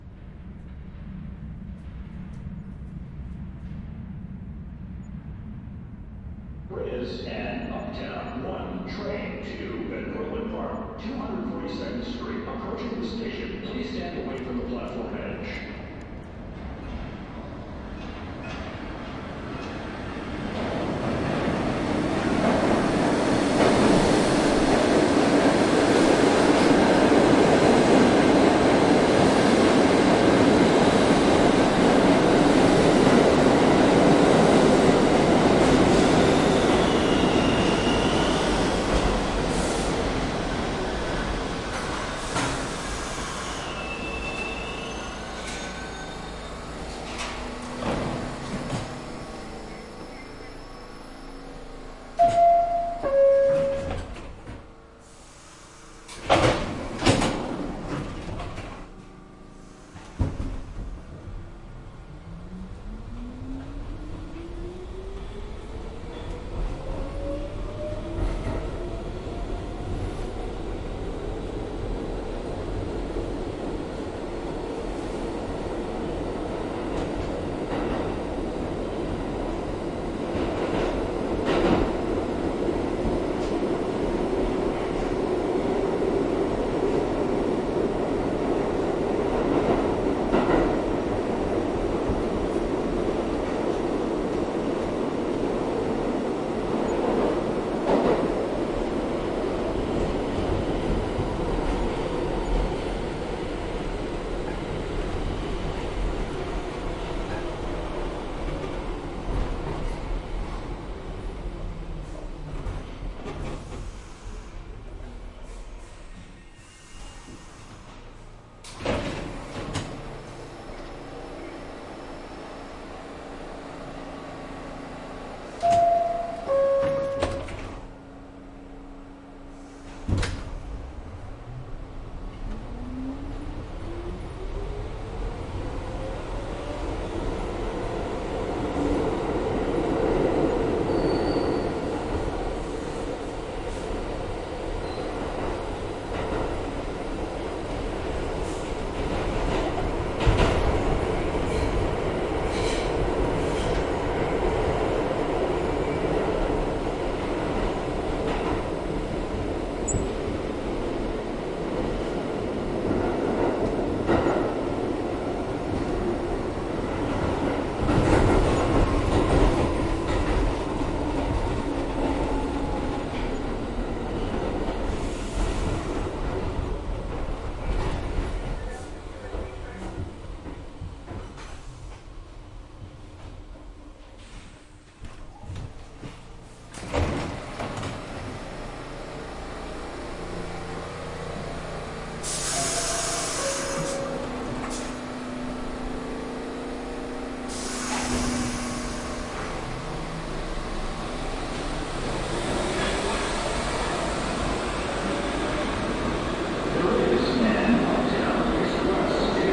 A high-quality NYC subway experience: A 1-Train arrives to the Rector Street Platform, and then the recordist transitions to the inside of the rear train car, and rides to Chambers Street Station.
*If an MTA announcement is included in this recording, rights to use the announcement portion of this audio may need to be obtained from the MTA and clearance from the individual making the announcement.